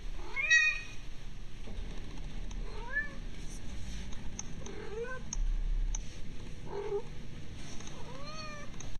01 Cat Miauing
This is my cat miauing when she wants me to pet her :)